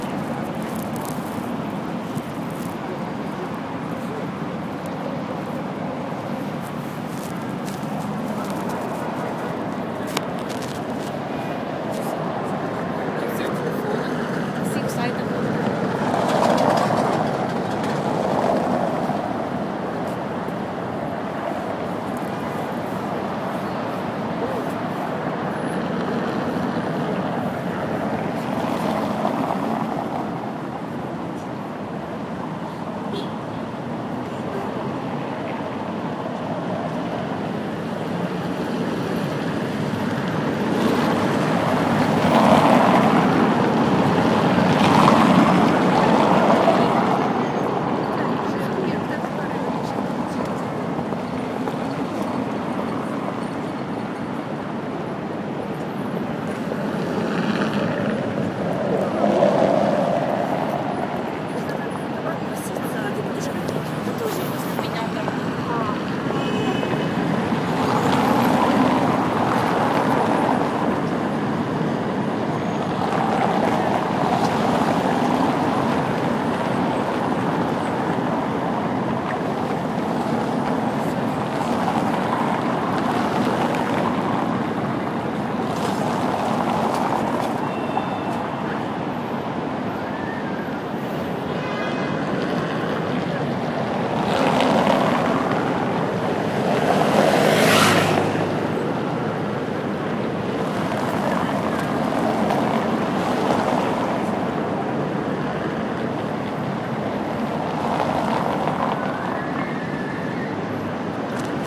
the sound of Kreschatik Street in Kiev, a stretch of which is cobbled, recorded with an iPhone4